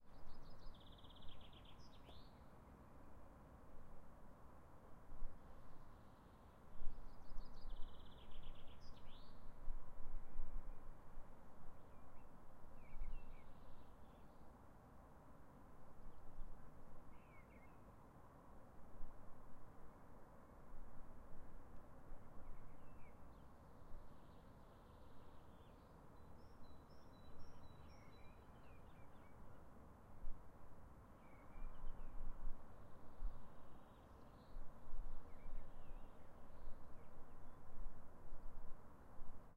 Birds In Belfast
Using my recently purchased Zoom H4N, I recorded the birds from my window early afternoon in Belfast using the standard mic's on the recorded.
A bit short, but I hope you get some use out of it :)
quiet, belfast, birds, afternoon, window, town, city, ambience